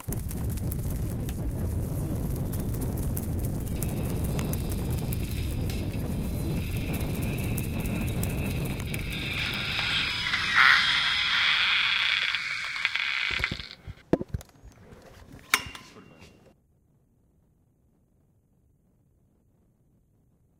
Soundtrack made by parents and children for the workshop “Caçadors de sons” at the Joan Miró Foundation in Barcelona.
Composició realitzada per pares, mares i fills, per el taller ‘Caçadors de sons’ a la Fundació Joan Miró de Barcelona.